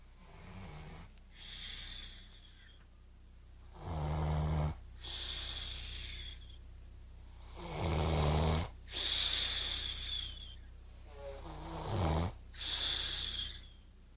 Recorded my dad snoring, then cleaned it up a bit to be able to loop.
breathing, sleeping, snore, snoring